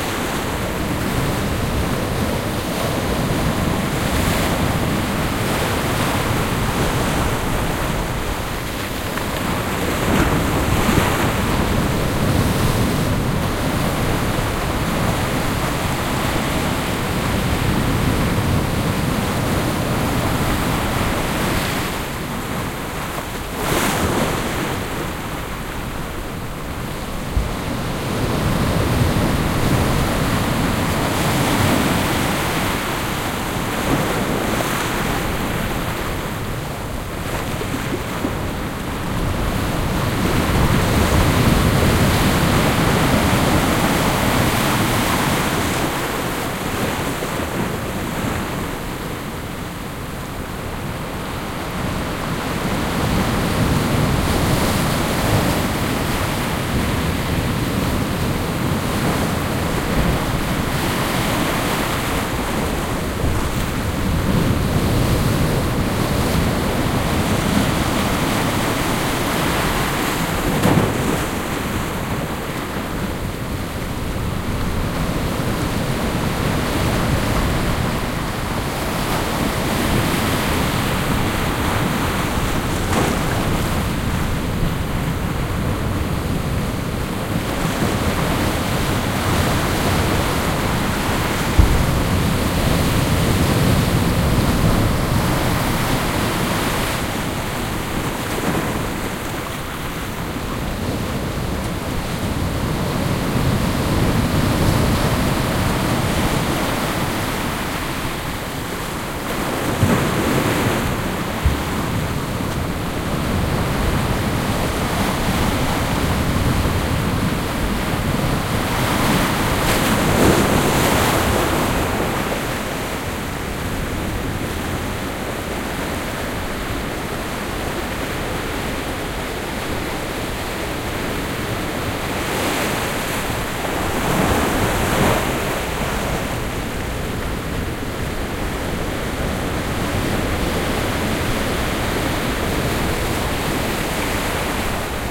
porto 19-05-14 quiet to moderate waves on rock beach close recording
Quiet day, close recording of the breaking waves.
waves, walking, rock, sand-beach, vilanova-de-gaia, beach, breaking, Oporto, wave, atlantic, ocean, Duero, sounscape, surf, Douro, soundman, binaural, sea, cavern, Porto